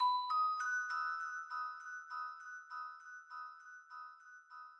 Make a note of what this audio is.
These sounds are samples taken from our 'Music Based on Final Fantasy' album which will be released on 25th April 2017.
Sample, Music-Based-on-Final-Fantasy, Glocks